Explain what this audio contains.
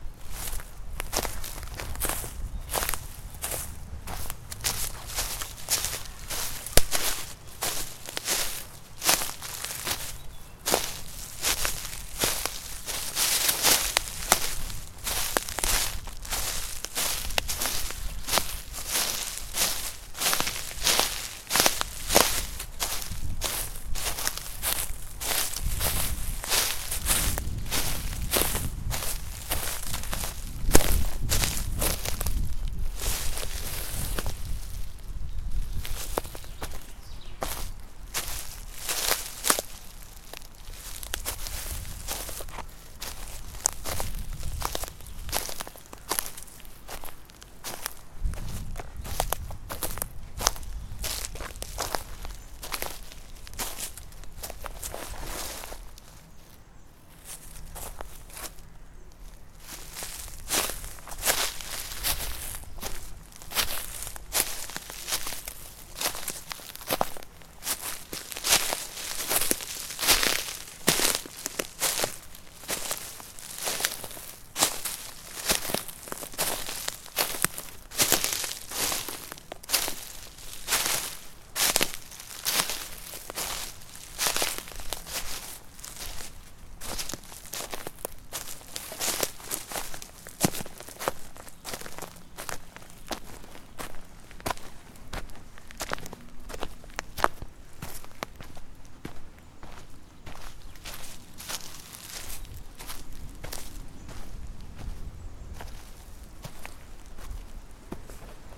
sfx turnschuhe im wald 02
Walking on gravel and leaves in the forest
leaves, forest, Walking, steps, gravel, running